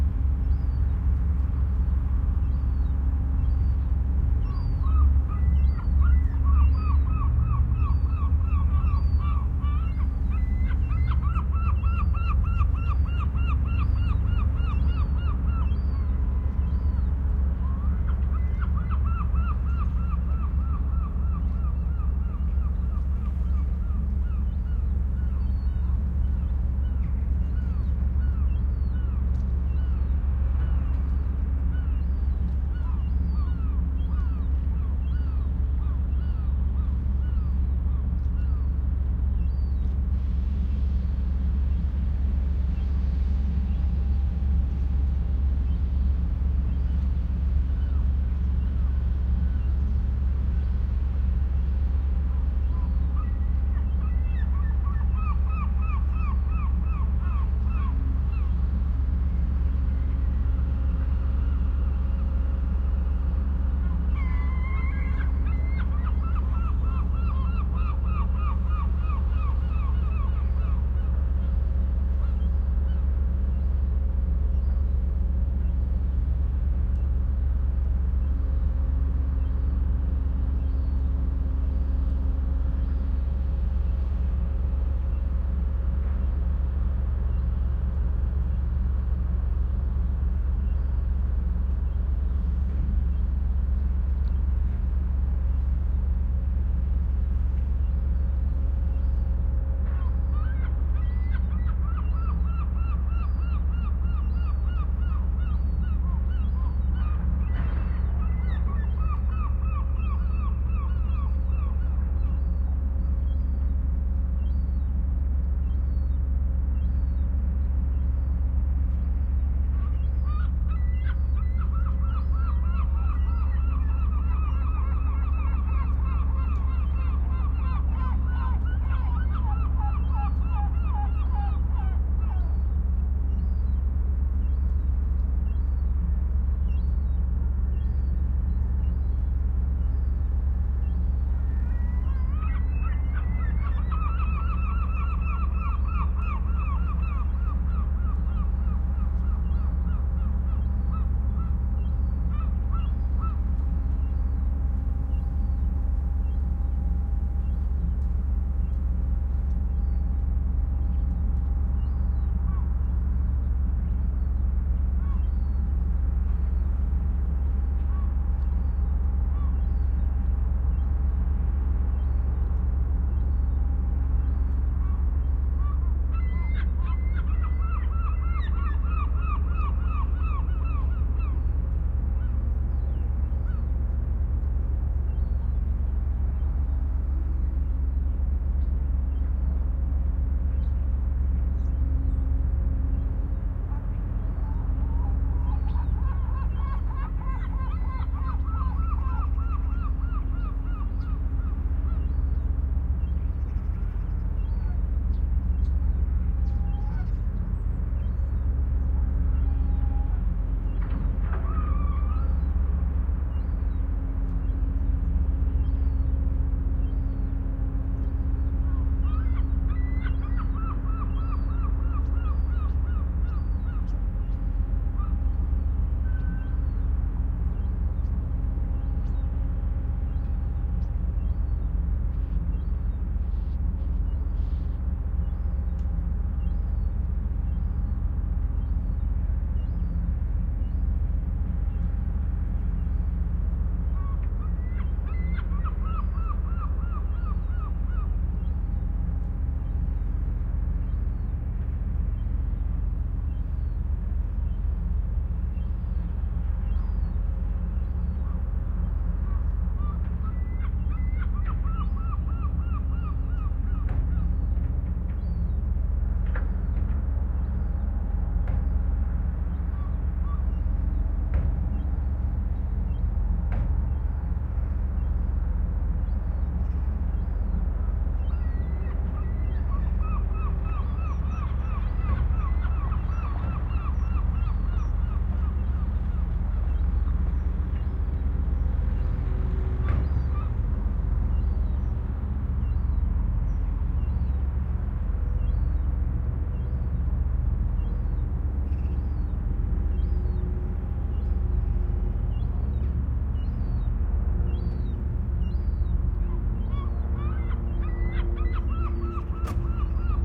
How to descripe this recording? Well: sea, ships and seagulls. In this case not very romantic.
Sennheiser MKH60 microphones into Oade FR2-le.